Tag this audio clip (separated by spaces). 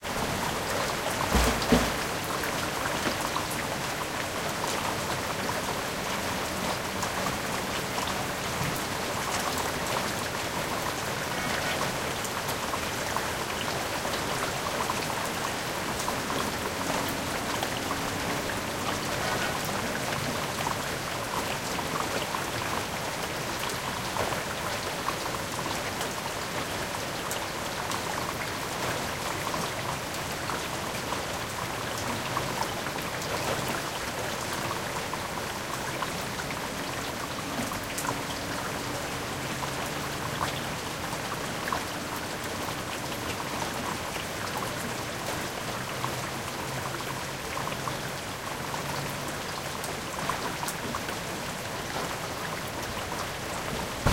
water rain drops lutsk ukraine